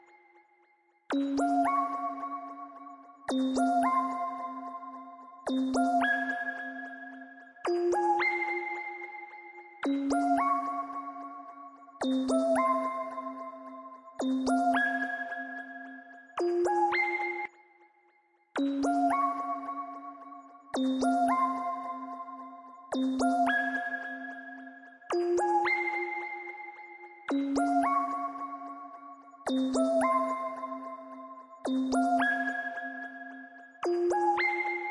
Mod arp, 110 BPM